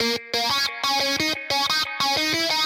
90 Atomik Guitar 03
fresh grungy guitar-good for lofi hiphop
atomic, grungy, hiphop, loop